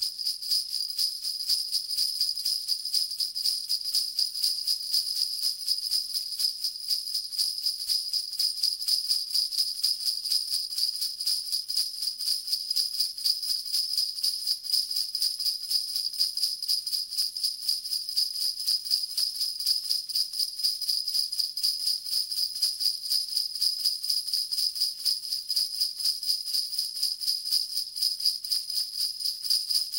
Stereo (large diaphragm condenser (SCM900) and dynamic (SM58) with slight phase adjustment. 122 bpm with tempo embedded with file from Presonus Studio One.